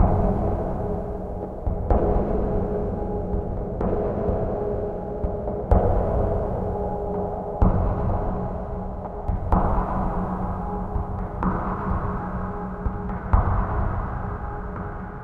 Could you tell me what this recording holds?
126 FX Flowd G#A#
A processed loop FX
psychedelic, noisy, dark, 126bpm, percussive, long, FX, reverb, slowly-meandering, processed